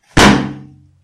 hit someting
I smash a bin liner in my bathroom
I used my laptop microphone
I edit this in audacity
That's all
bang, gun, smash, shooting